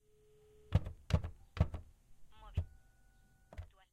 digital telf buttons
Recording of the buttons on a digital phone in a small studio room.
button, digital, telephone